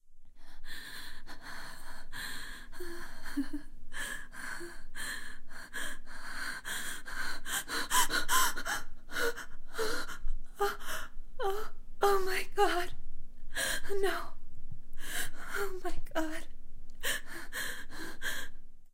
Scared Girl Request # 4
girl, vocal, request, voice, female, scared